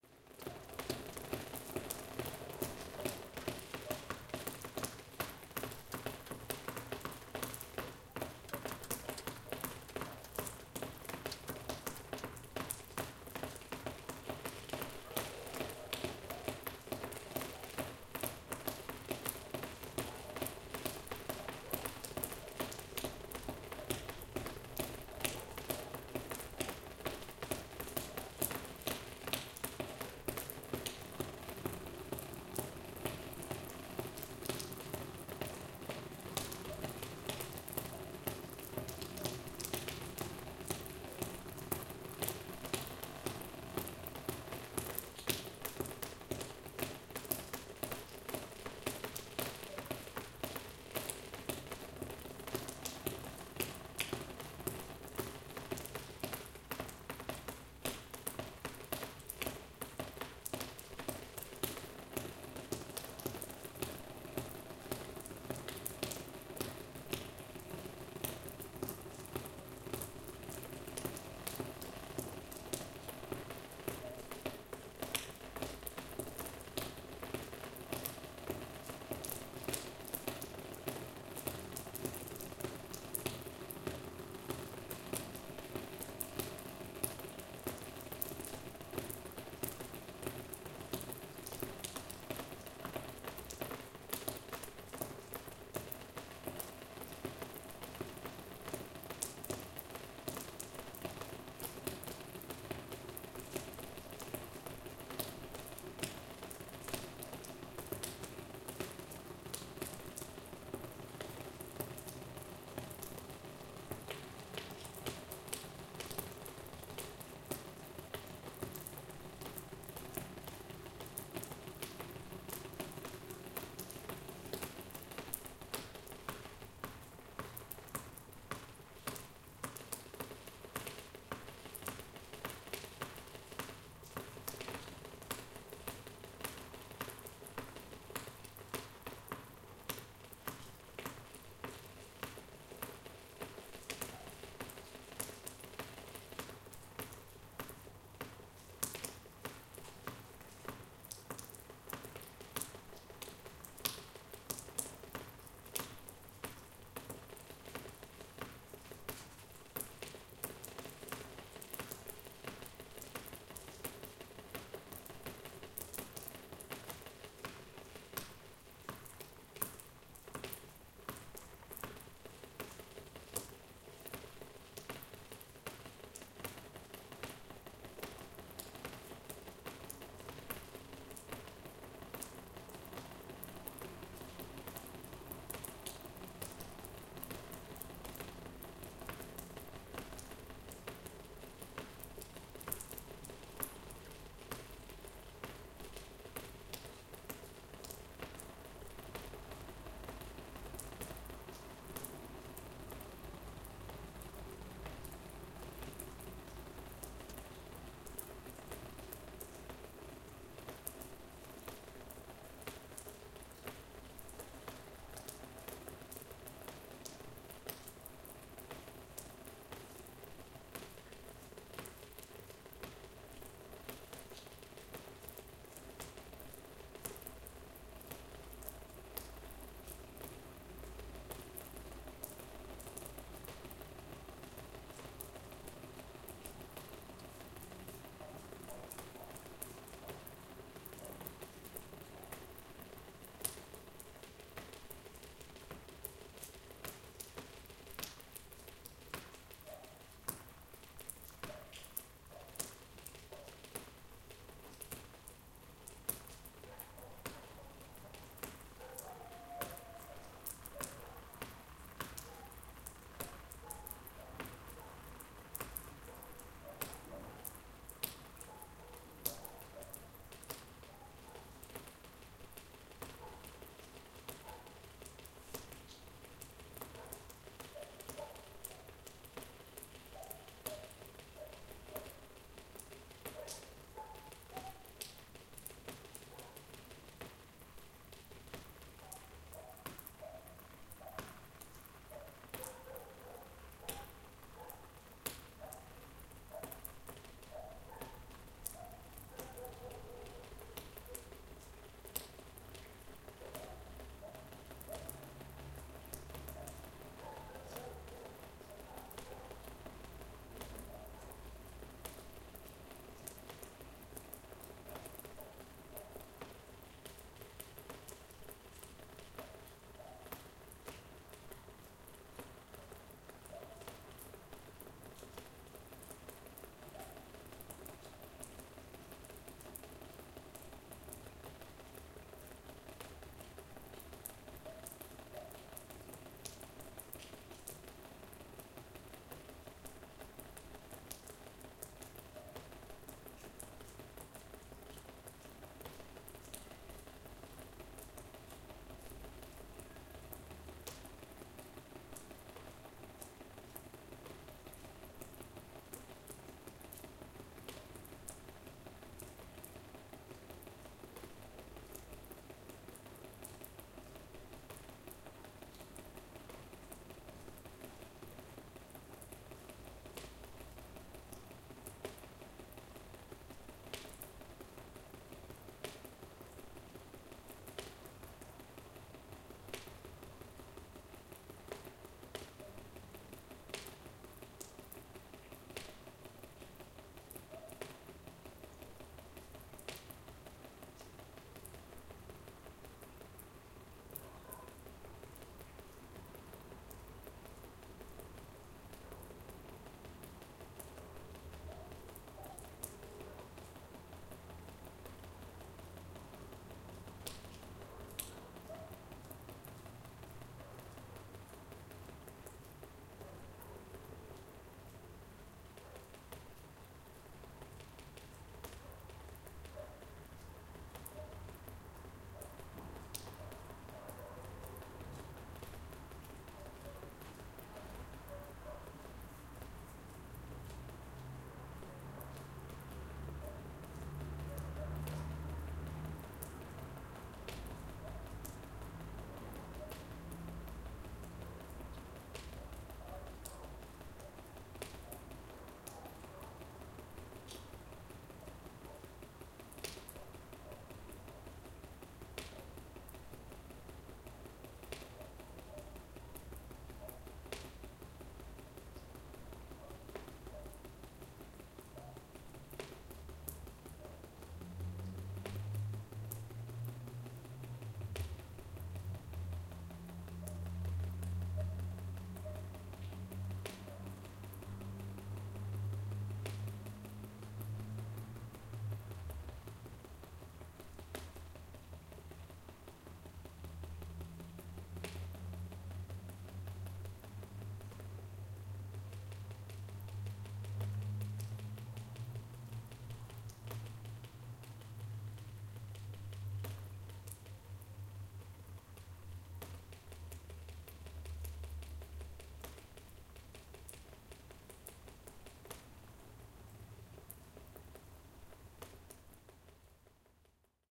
Droplets from roof gutter - Ariccia
Immediately after a heavy rain, the water drops keep falling on a plastic shelter, creating a rhythmic weaving constantly evolving. Audio recording made with Zoom H4N in an alley in Ariccia.
Subito dopo una forte pioggia, le gocce d'acqua continuano a cadere su una pensilina di plastica, creando una tessitura ritmica in continua evoluzione. Registrazione effettuata con Zoom H4N.